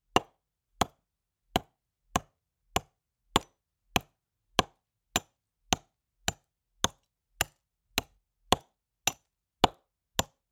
Wood Chop 03
Chopping wood with a hatchet.
Rode M3 > Marantz PMD661.
chopping, chopping-tree, chopping-wood, wood